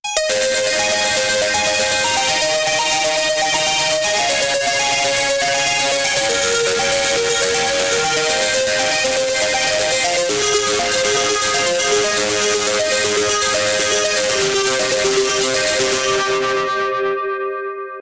ARP B - var 6
ARPS B - I took a self created Bell sound from Native Instruments FM8 VSTi within Cubase 5, made a little arpeggio-like sound for it, and mangled the sound through the Quad Frohmage effect resulting in 8 different flavours (1 till 8). 8 bar loop with an added 9th bar for the tail at 4/4 120 BPM. Enjoy!